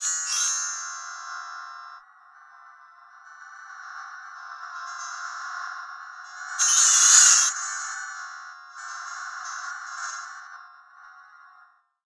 Panning chimes processed with a granular synthesis software.
chime, granular, pan, synthesis